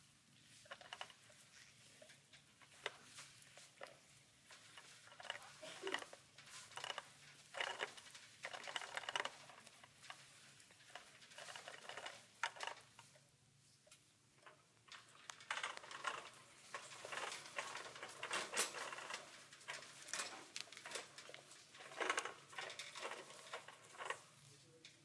Shopping cart wheels at slow speed